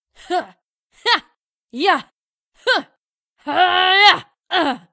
Female Grunts For Games
Here is a female grunting in a fight.
Want to use my voice for a game?
clear, crisp, deep, fight, fighting, game, girl, grunt, hd, speak